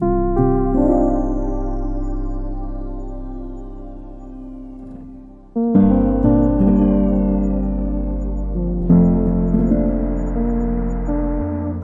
melody faithleap Cmin 81
short lil piano composition using imagiro piano 2, a sub, a synth one shot with processing, and a lil bitcrush effect. C minor. 81 BPM.
melancholic, calm, chill, slow, chords, design, synth, tycho, piano, soft, sound, vibe, melody, spacey